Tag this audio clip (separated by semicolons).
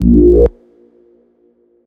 acid; bass; filter; low; pass; resonance